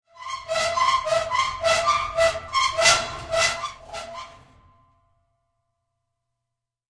Squeaking Metal Closet Door in Basement 1
Opening and closing a metallic closet to make a painfully squeaking sound. Recorded in stereo with Zoom H4 and Rode NT4.
aching, basement, close, closing, door, gate, horror, iron, metal, metallic, open, opening, painful, portal, room, squeaking, squeaks, squeaky, squeeking, terror